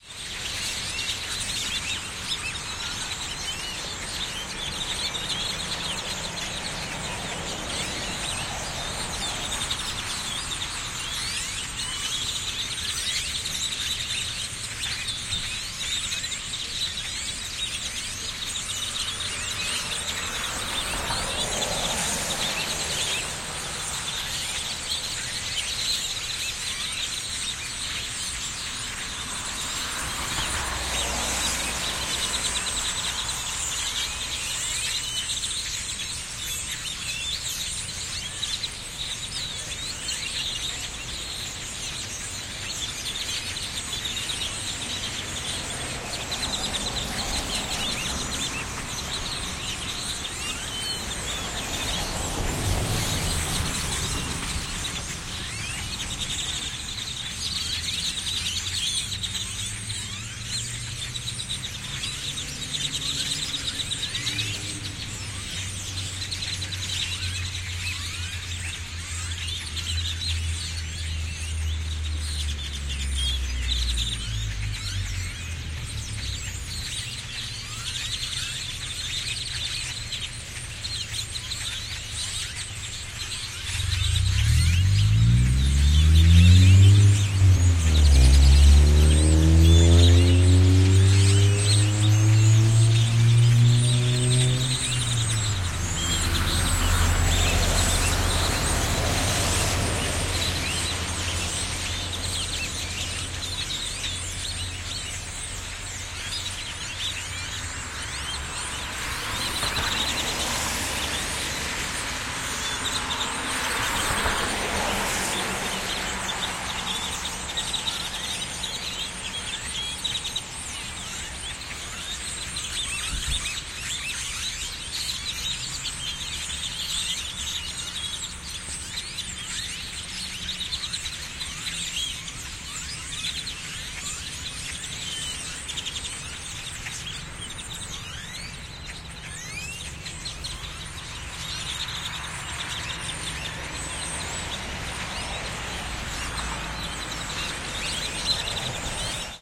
Ambi - Birds on electrical lines with passing cars - binaural stereo recording DPA4060 NAGRA SD - 2012 01 10 Austin
This is a binaural audio recording, so for most true to nature audio experience please use headphones.
passing, texas, SD, Many, Ambi, 4060, Austin, birds, DPA, ambiance, binaural, NAGRA, cars